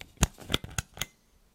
Opening a latch
buzz, latch, machine, mechanical, whir